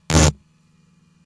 ELECZap-int techno02 ASD lib-zoom-piezzo-stephan
buzz, distorted, electro, riser, techno